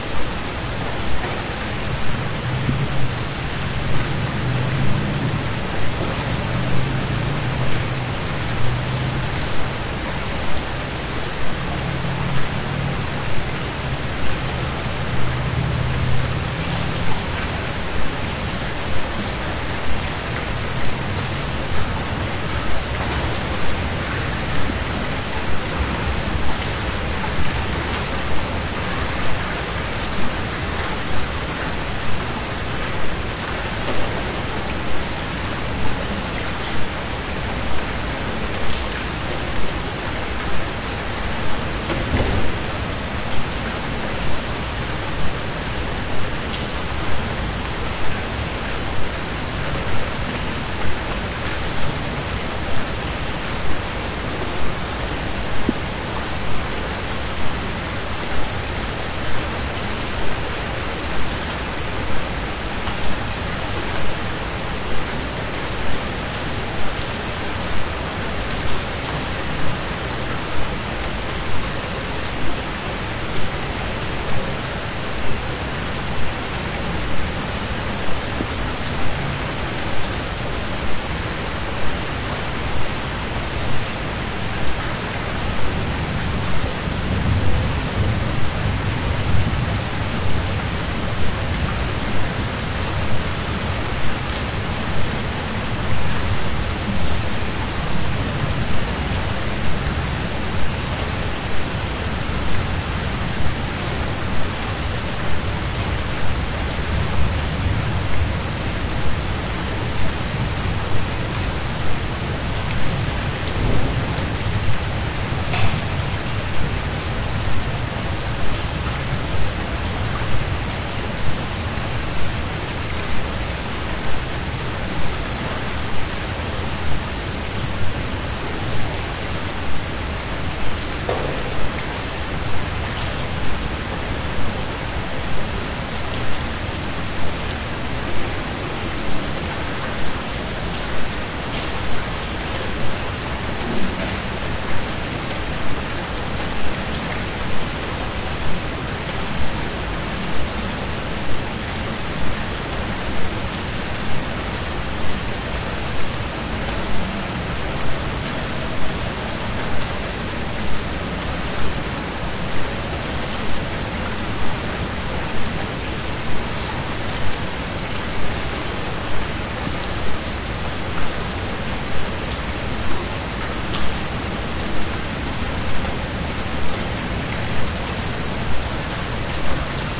It's raining so much in the Berlin summer of 2013.
Recorded with Zoom H2. Edited with Audacity.
rain, weather, urban, wet, bad-weather, city, raining